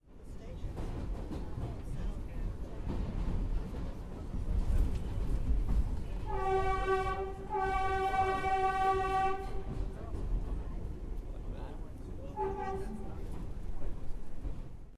AMBIANCE NYC Subway train, walla, horn,screeching
Ambiance NYC subway train, walla, horn, screeching
ambiance, horn, nyc, screeching, subway, train, walla